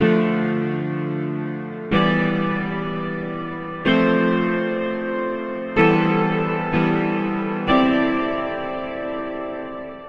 On Rd piano loop 3
Another piano loop.
on-rd, loop, piano